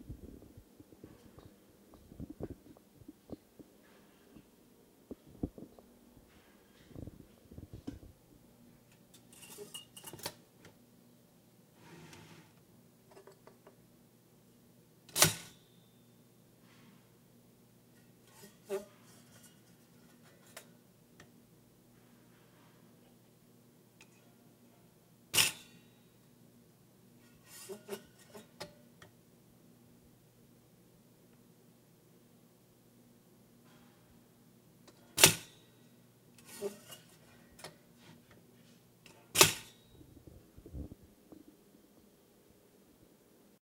Recorded using a Canon GL1 and an Audiotechnica shotgun mic
(unsure of model number, a cheap one). The sound of a toaster being set
and then popping up. Audio is low, gain might need to be adjusted.
toaster, household